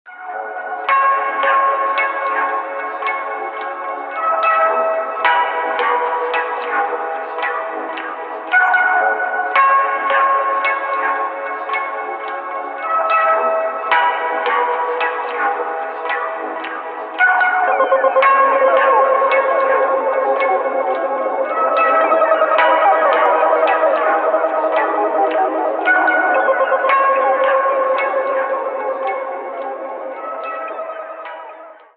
Sounds of a summer breeze